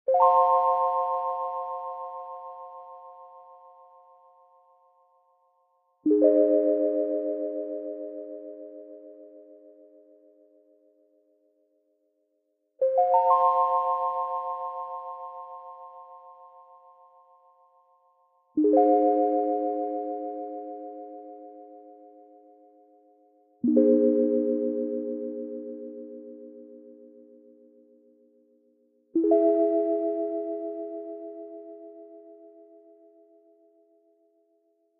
Magic Stars Retro Sparkle
A magic spell or shine sound effect with a retro vibe.